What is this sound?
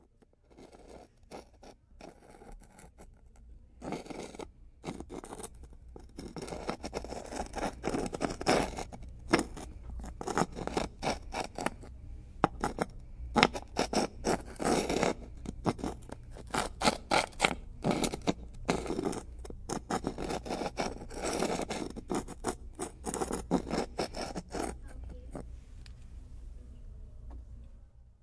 This sound was made with a little rock being dragged on a wooden box. It is perfect for giving the impression that something heavy is being dragged on the floor, or maybe a rock is being dragged. It can also be used as if someone is being asphyxiated. (Esse som foi produzido com uma pequena pedra sendo arrastada por uma caixa de madeira. É perfeito para dar a impressão de que algo pesado está sendo arrastado no chão, ou que apenas uma pedra está sendo arrastada. Também pode dar a impressão de que alguém está sendo asfixiado.).
Recorded for the subject of Audio Capture and Edition, from the TV and Radio course of University Anhembi Morumbi, Sao Paulo – SP. Brazil.
Gravado para a disciplina de Captação e Edição de Áudio do curso Rádio, TV e Internet, Universidade Anhembi Morumbi. São Paulo-SP. Brasil.
asphyxiated, stones